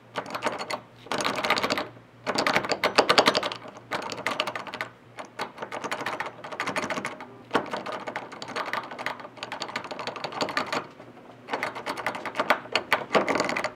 DOOR HANDLE JIGGLE
Sound of door handle being jiggled at various speeds. Recorded on a Marantz PMD661 with a shotgun mic.